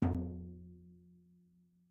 One-shot from Versilian Studios Chamber Orchestra 2: Community Edition sampling project.
Instrument family: Percussion - Drums
Instrument: Tenor Lower
Room type: Band Rehearsal Space
Microphone: 2x SM-57 spaced pair
single-note, lower, drums, tenor, percussion, multisample, vsco-2